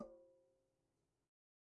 Metal Timbale left open 001
conga, drum, garage, god, home, kit, real, record, timbale, trash